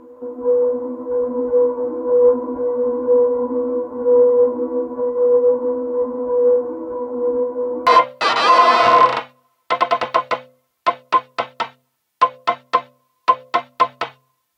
Making weird sounds on a modular synthesizer.